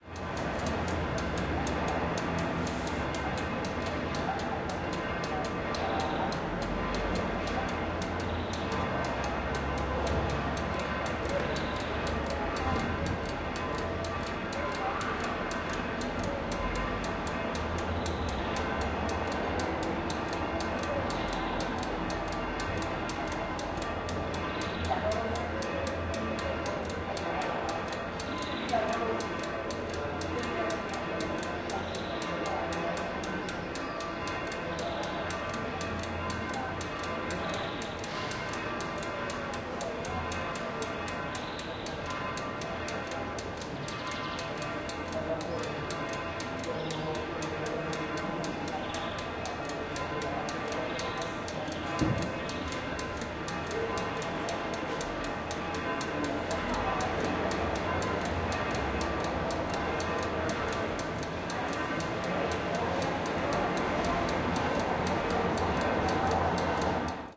20170423 raw.midside
By request, the raw recording from a Mid/Side setup. Left channel is from a shotgun microphone (MKH60) pointed at an egg timer, and the right channel is from a figure-8 mic (MKH30) getting street ambiance from my balcony. Gear used, Sennheiser MKH 60 + MKH 30 into Shure FP24 preamp, and Tascam DR-60D MkII recorder.
ambiance, atmosphere, field-recording, mid-side, technique, test